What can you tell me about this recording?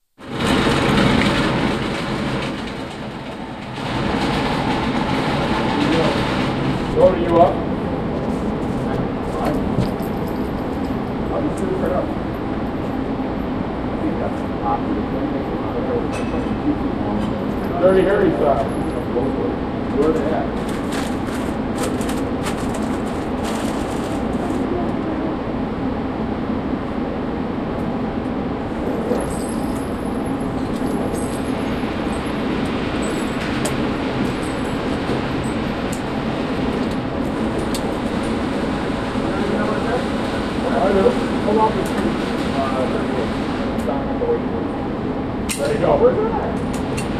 GunRange Mega1
Retracting target sounds, guys talking in gun range booth, guy saying ‘dirty harry style’, gun shells on floor, lock and load, guy saying ‘ready to go’
22, 9, caliber, facility, gun, indoor, millimeter, nine, range, shots, twenty-two